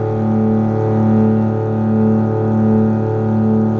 Violin loop1
a short violin loop
buzz, hum, loop, violin